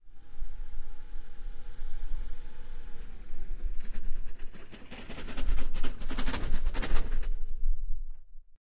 CAR STALL
A stereo recording of an early 90's Honda Accord moving slowly Right to Left stalling in the center.
Stereo Matched Oktava MC-012 Cadioid Capsules ORTF Stereo Array
car, machine, field-recording, car-stall